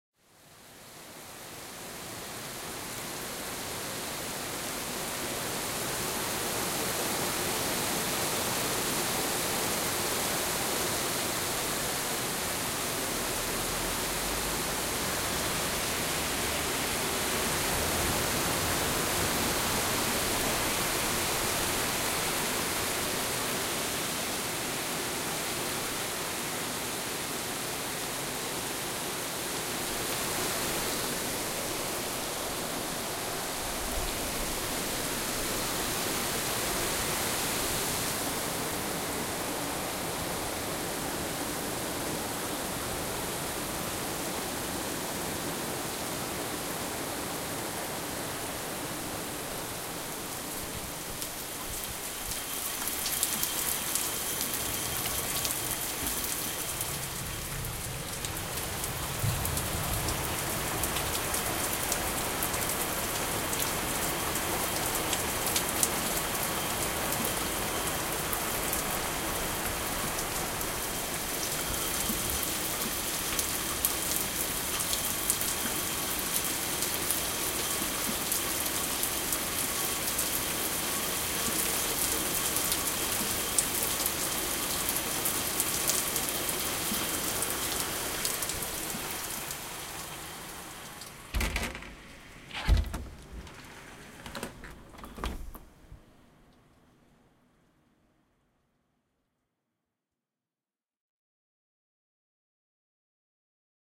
temporale-mix-prova
temporale mix prova, inside the house from different rooms, MS technique with Zoom H2n
temporale, prova, mix